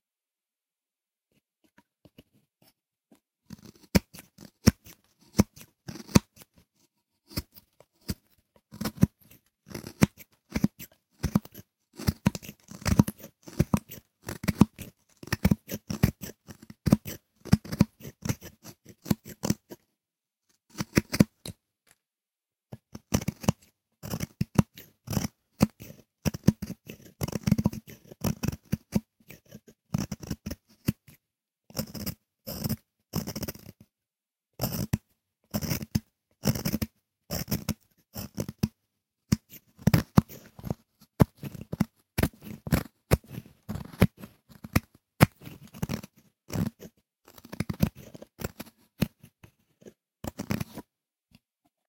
Blade on wood